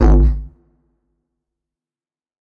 Dino Call 10
short didgeridoo "shot" with some reverb. enjoy